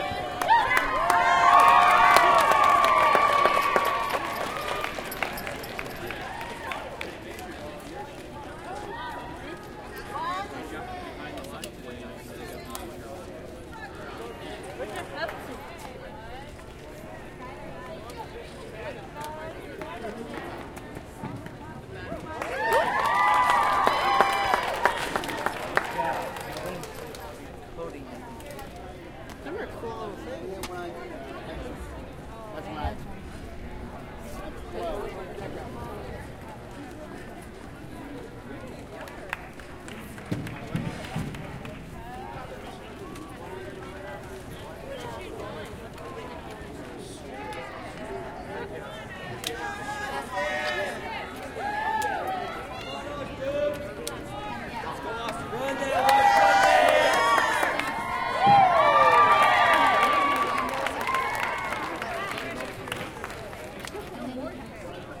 intermittent cheering
over one minute of an indoor crowd that breaks into loud cheers a few times, recorded on a Zoom with built in mics
cheering, indoor-crowd